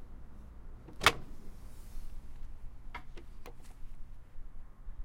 Door, front, opening
A recording of a front door being opened.
ambience, ambient, Door, foley